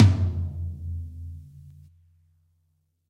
Middle Tom Of God Wet 011
pack, middle, drumset, set, drum, realistic, kit, tom